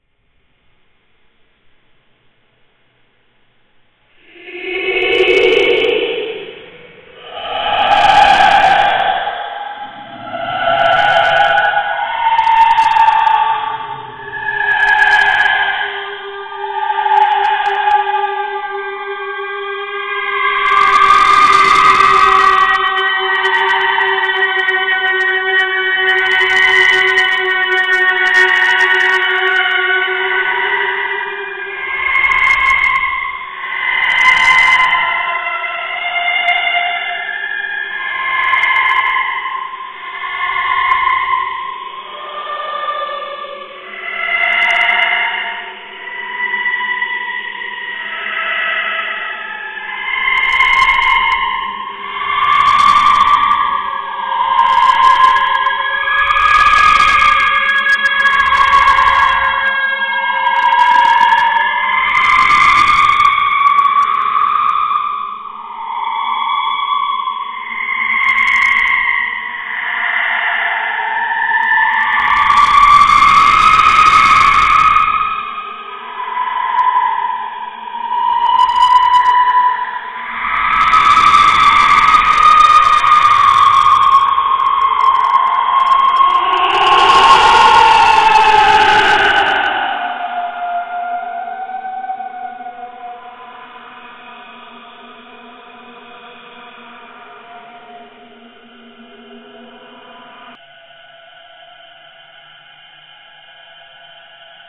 This is my ghost opera sound it is perfect for a movie that is haunted.